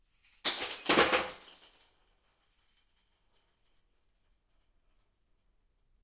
Big crash ambient 2
This sample is a crash of plastic and metal stuffs. Recorded with two condenser rode microphones and mixed with soundtrack pro.
(6 channels surround!)
room, hit, break, metal, surround, plastic, sound, crash, fx